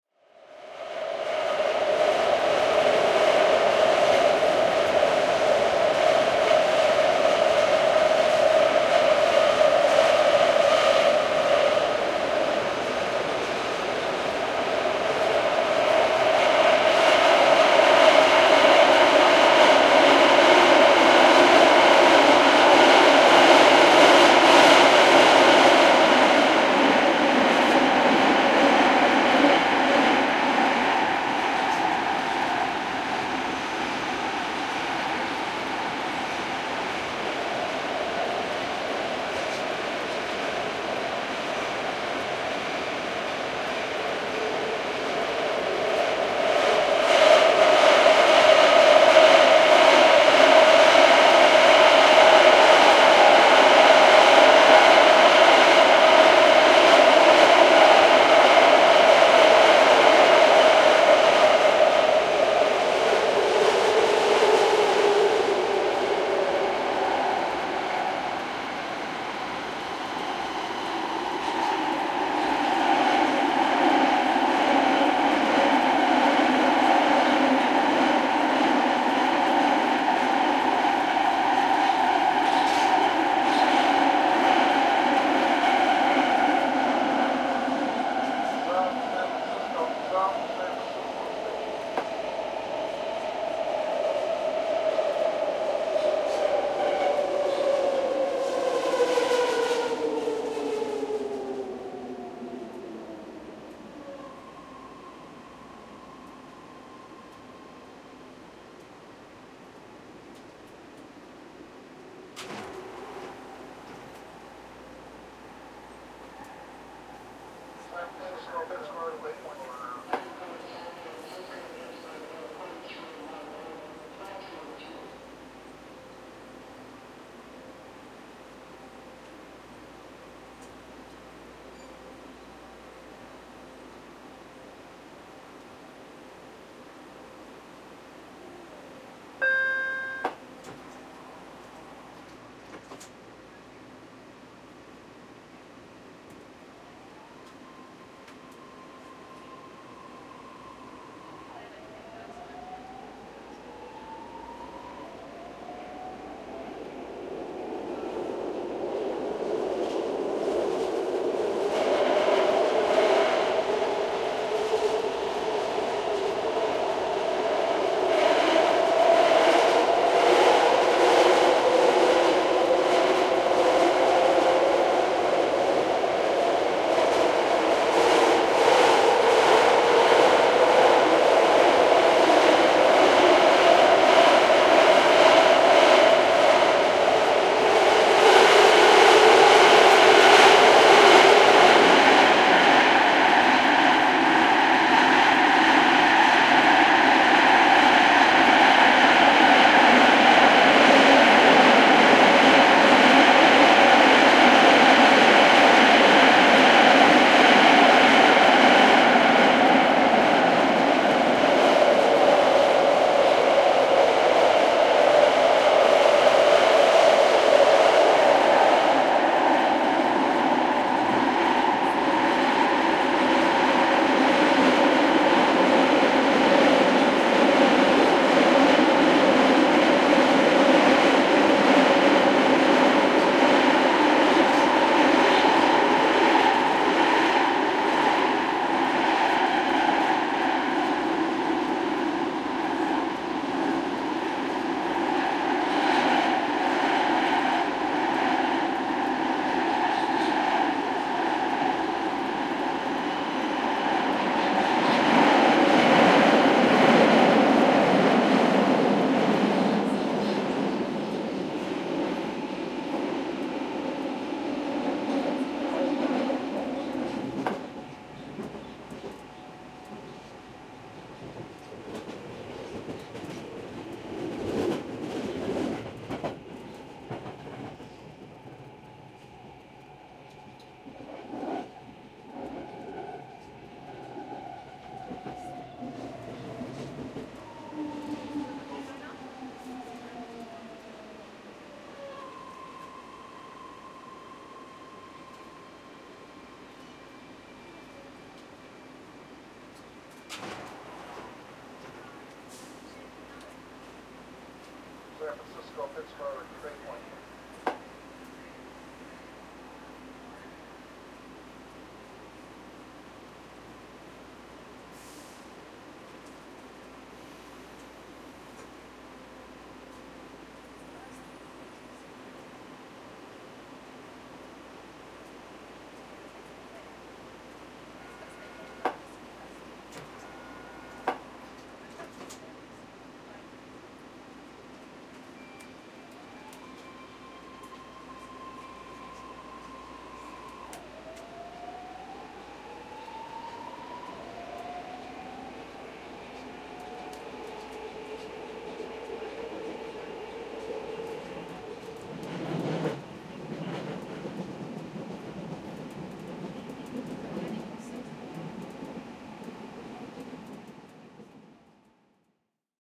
Interior of BART (Bay Area Rapid Transit) commuter train on a northbound train leaving from San Francisco International Airport (SFO). The recording was made in the middle of a mostly empty car, with the left channel oriented to the front and right oriented to the rear, It starts just past the San Bruno station and ends at the Colma Station (this includes one stop, at South San Francisco). This clip includes sections in tunnels (which are the loudest) above ground (relatively quiet) at at stations (with announcements). There is a small amount of passenger chatter audible during the station stops. Recorded with a hand-held Nagra ARES-M, with the green-band clip-on XY microphone.